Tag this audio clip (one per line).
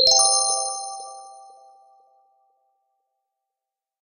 fresh
cute
game
sound
finish
pong
pop
ping
se
sign
click
decide